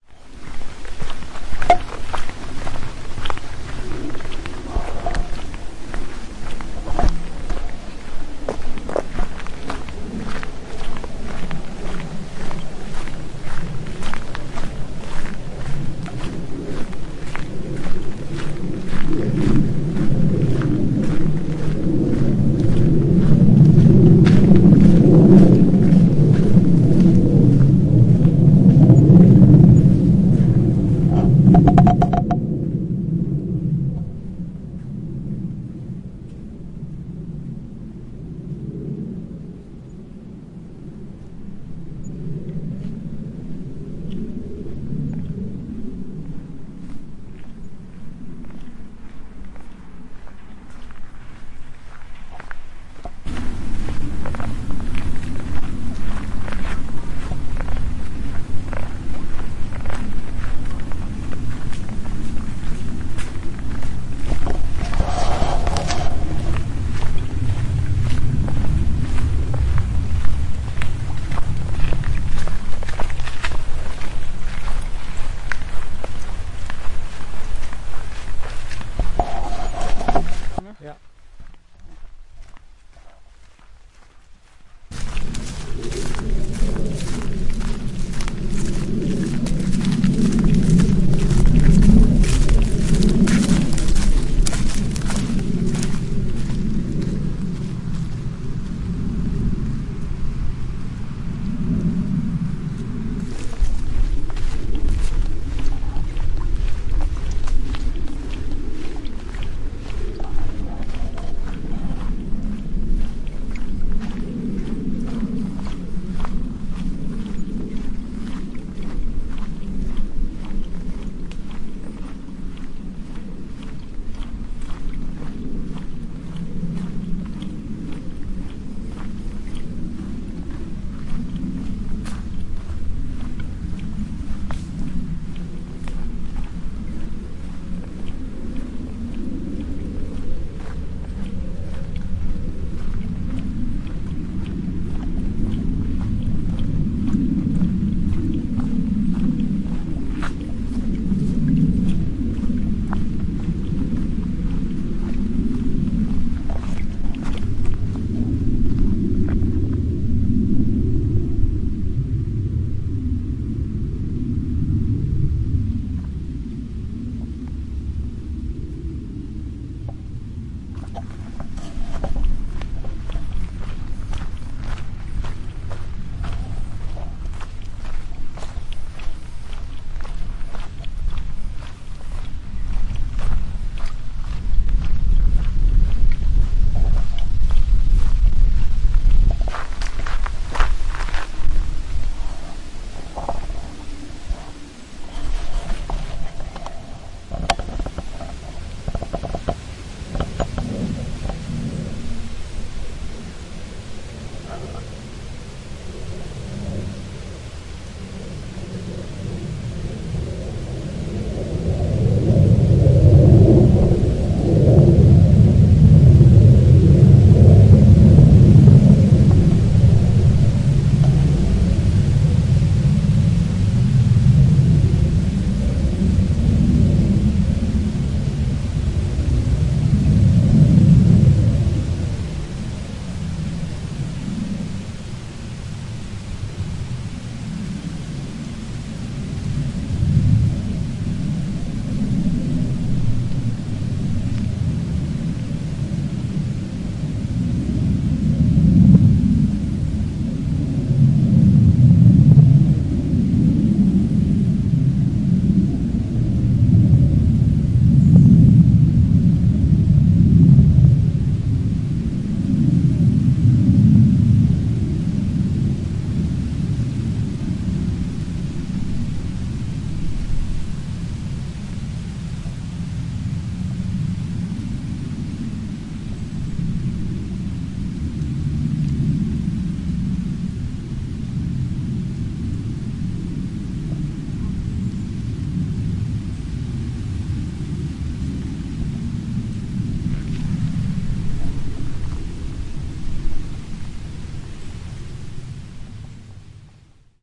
18.8.2015, 14.30 Uhr: Militärischer Fluglärm in der Flugzone ED-R 401 über Region Rheinsberg / Kagar / Wallitz / Zechlin150818 1429
Die touristisch attraktive Region Rheinsberg liegt seit Herbst 2013 unter der neu und heimlich eingerichteten militärischen Sonderflugzone ED-R 401 MVPA North East.
Hier zu hören: Ausschnitt aus einem Spaziergang über ein Feld am Rande von 16837 Kagar am 18.8.2015 um 14.29 Uhr. Zu hören ist, wie einnehmend, vorder- und hintergründig der militärische Flugbetrieb diese bis Herbst 2013 völlig stille Landschaft verseucht.
This sound snippet: A walk over a field in the popular holiday region of Rheinsberg / Mecklenburg Lakes region in the north east of Germany, famous for its natural beauty and - until autumn 2013 - for its rare silence and tranquility. This snippet is of Aug 18th, 2:29pm, ongoing. You will hear Eurofighter / Typhoon Jets poisoning the landscape with military aircraft noise. High altitude flight patterns blanket the area with thorough and omnipresent noise carpets.